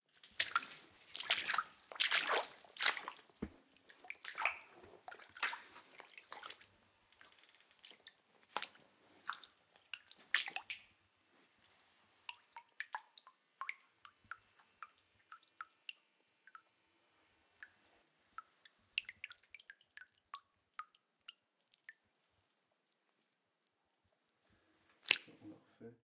Gouttes d'eau
Some water falling slowly recorded on DAT (Tascam DAP-1) with a Sennheiser ME66 by G de Courtivron.
water, falling